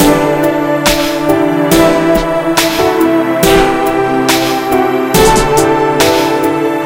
Made this short loop and thought I would share, I hope you enjoy! Its dry due to no effects and yes this is FREE!
DAW: Fl studio
BPM: 140.00
12:43 5/24/15
- Justin Payne